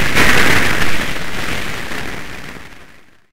a synthetic sounding lighting strike created with the Bristol Moog Mini emulation and slightly post processed in Audacity.
There are 3 different ones to add some variations.
sound, striking